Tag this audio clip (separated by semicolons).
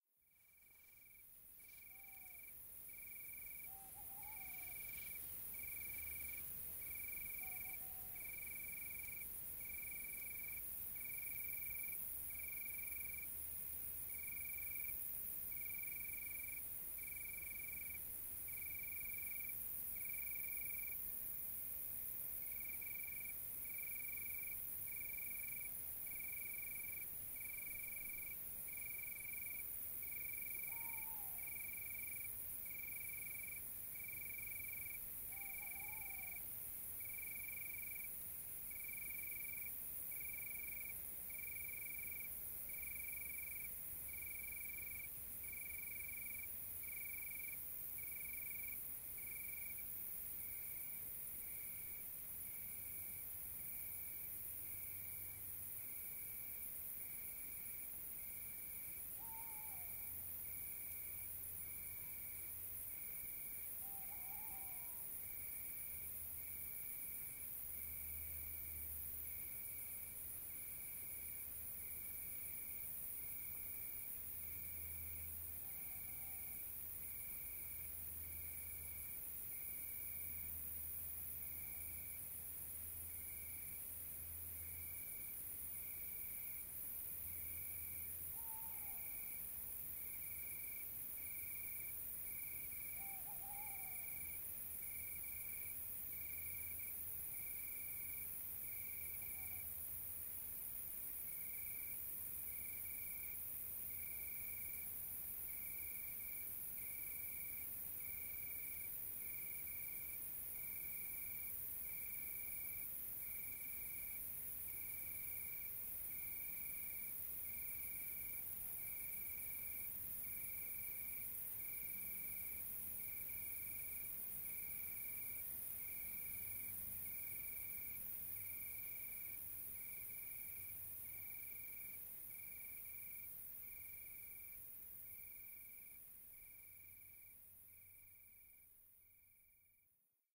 crickets; night; owl; summer; tawnyowl